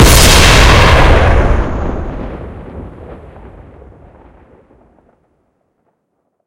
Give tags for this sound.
Bass
Boom
gun
Powerful
Rifle
shot
Sniper

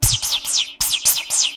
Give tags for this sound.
weapon
beam
space
laser
sci-fi
gun
particle

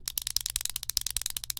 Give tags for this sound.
firing
gun
shoot
weapon

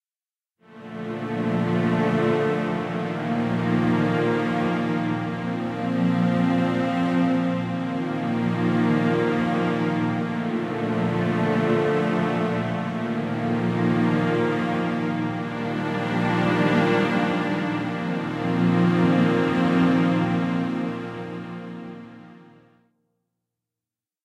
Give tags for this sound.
ambience
ambient
atmosphere
background
background-sound
cinematic
dark
deep
drama
dramatic
drone
film
hollywood
horror
mood
movie
music
pad
scary
soundscape
spooky
story
strings
suspense
thrill
thriller
trailer

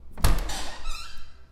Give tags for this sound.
door
lock
open
reverb
wood

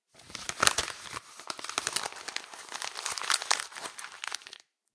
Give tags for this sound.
away
garbage
paper
ripping
rumble
sheet
throw
trash

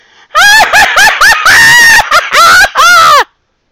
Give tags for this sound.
female
giggle
laugh
laughing
laughter
woman

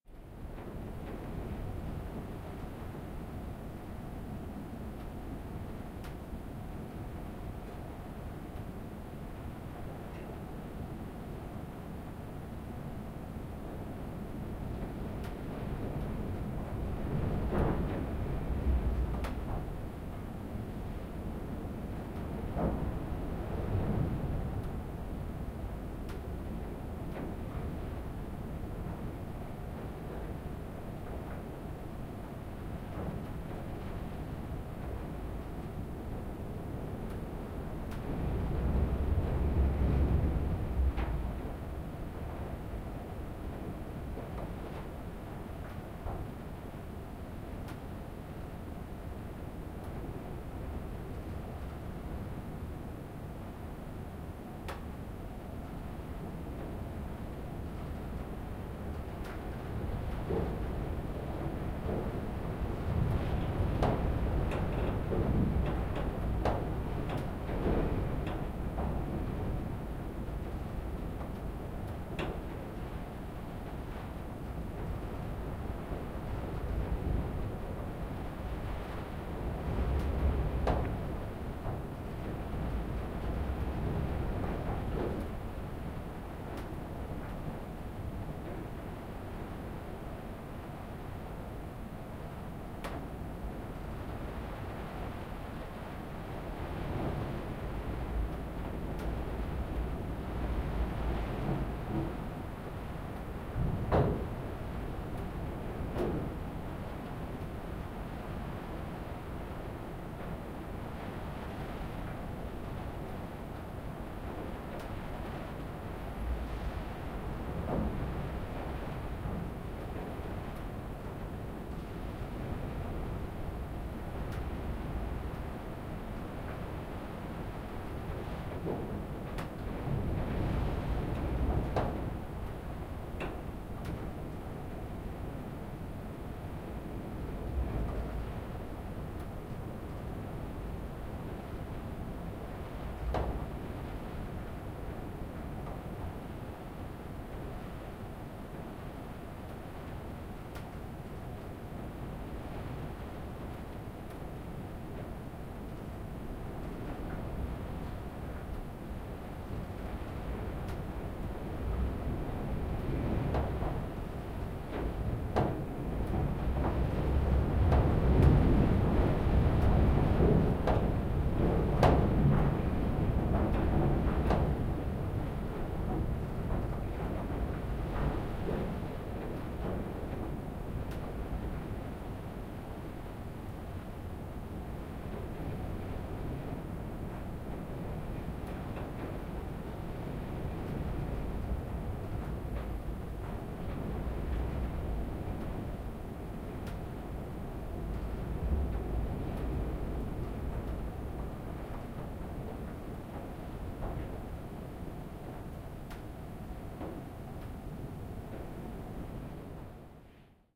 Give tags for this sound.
storm
attic
wood
inside
wind
weather

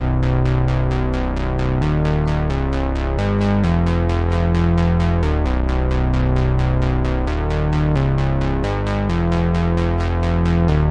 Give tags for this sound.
loop
electro
bass
moog
synth

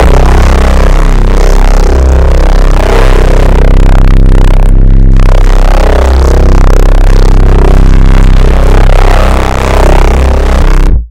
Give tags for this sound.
bass
driven
reece

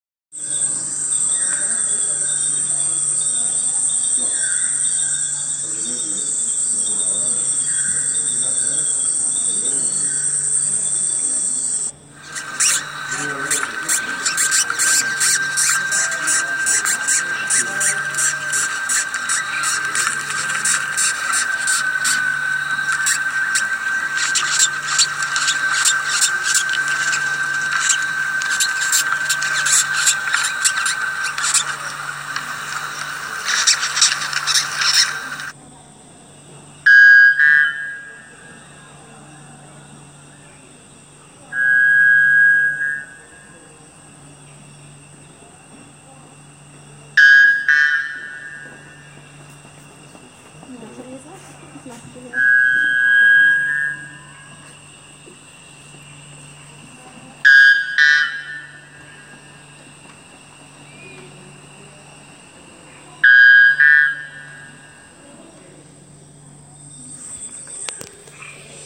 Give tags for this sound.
bird
birds
exotic
jungle
tropical

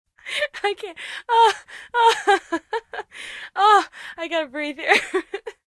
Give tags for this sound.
Laugh
vocal
Laughing
hilarious
acting
tickling
human
chuckle
lady
voice
tickled
woman
happy
humor
Funny
getting-tickled
female
tickle

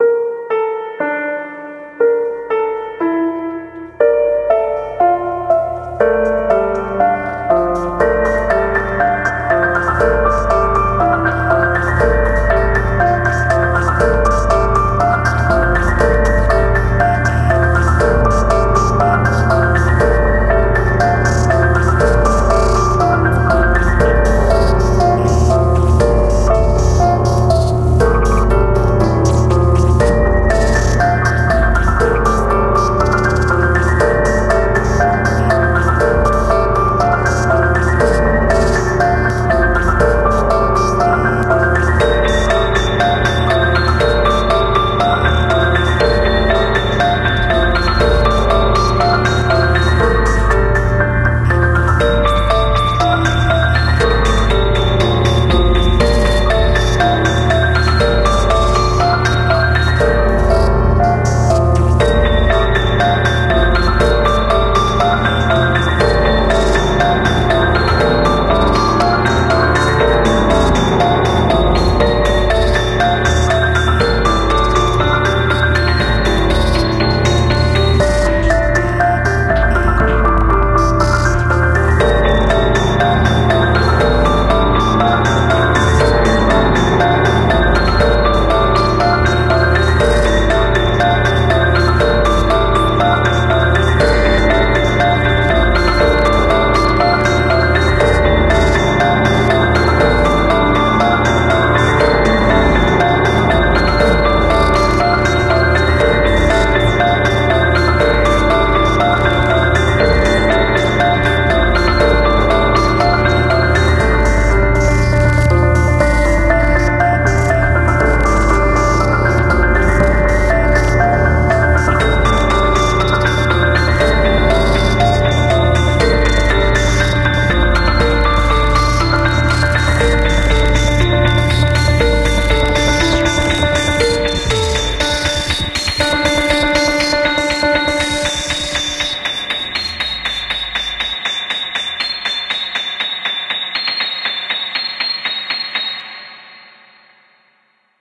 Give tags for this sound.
dense
electronic
broken
gltichy
music
app-music
suspense
energy
lo-fi
digital
DAW
raw
intense
tension
piano
terror
harsh
build-up
app
2014
glitch

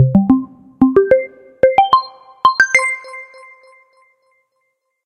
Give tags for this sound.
chime
sound
attention

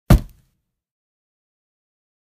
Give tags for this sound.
2x4
drop
floor
foley
ground
impact
noise
tall
tap
thap
thud
wood

